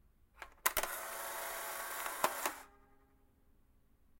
The "shutter" noise of the Polaroid Spirit 600 camera